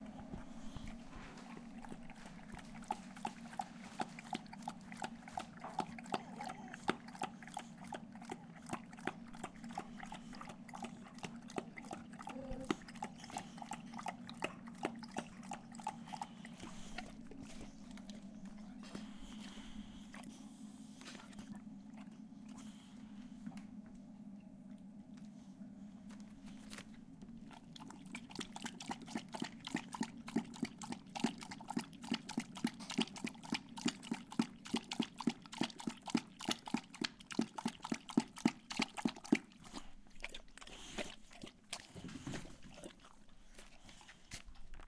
dog drinking Water
drinking, dog, Water